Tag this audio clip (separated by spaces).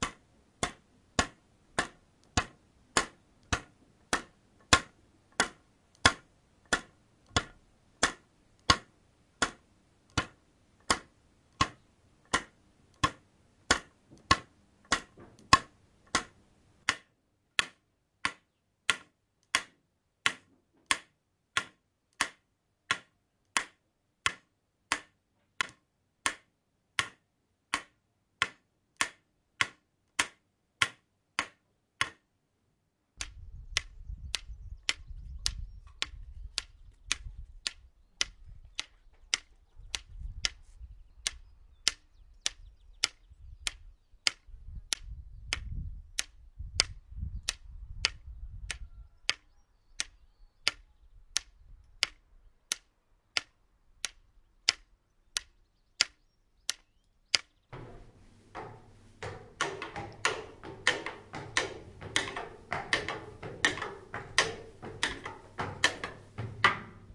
asfalt carpet floor vood-steps white-cane